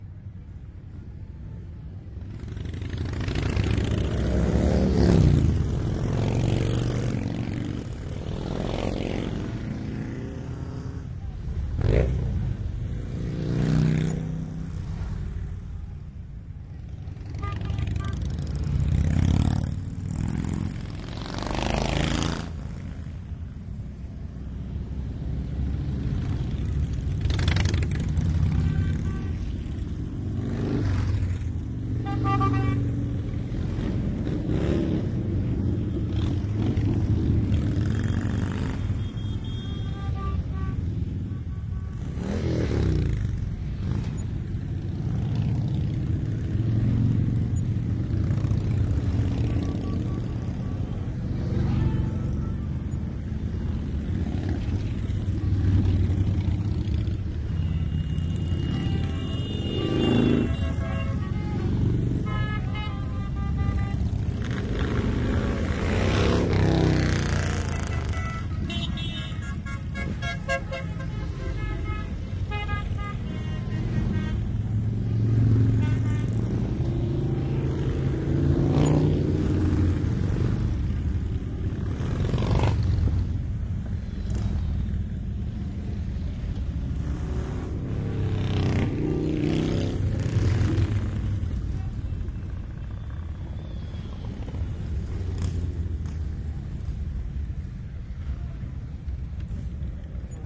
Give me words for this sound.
Rolling Thunder Motorcycles horns doppler.1
bike, motorcycles, rolling-thunder, horns, binaural, multiple-motorcycles, doppler